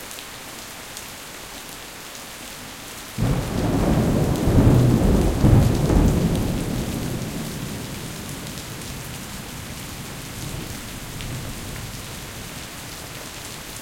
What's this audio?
Sudden thunder during a tropical storm. Recorded in Kuala Lumpur, Malaysia, with a Zoom H6.